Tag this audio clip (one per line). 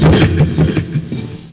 amp
distortion
effect
electric
guitar
noise